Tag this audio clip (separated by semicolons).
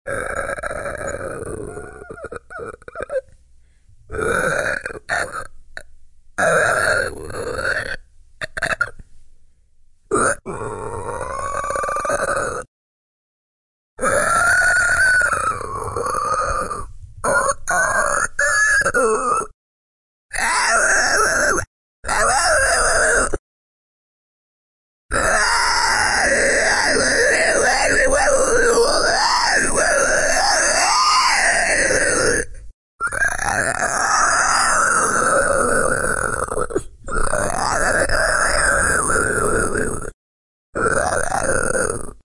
beast; creature; creatures; creepy; horror; screech; terror